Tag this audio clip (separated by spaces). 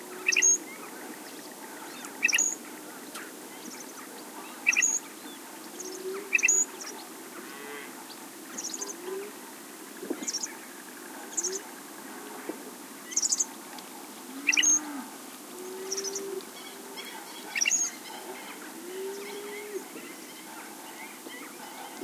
birds field-recording marsh nature stilts warblers